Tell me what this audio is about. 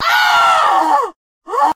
a processed scream from fruity loops.
processed, vocal, scream, panda